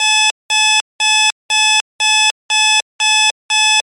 Alarm Clock Buzzer
This sound was recorded from a faulty alarm clock radio.
Model name: First Austria RW-2400